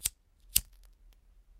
this is the sound of a (butane gas) lighter, with a crackling noise in the end.
fire, flintstone, gas, flame